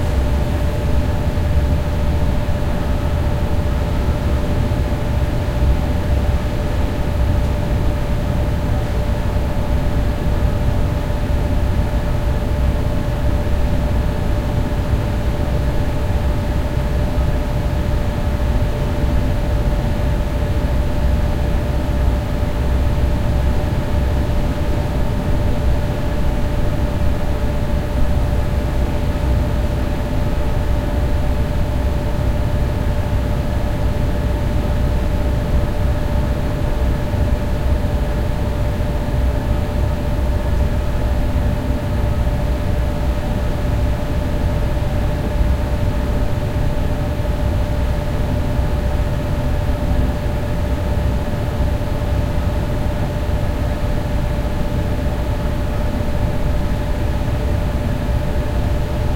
Machineroom Air Motor
Electric engines for big building climate control.
Zoom H6
Stereo